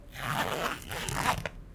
zipper closing
Closing a zipper from a small pocket coin bag
zip, bag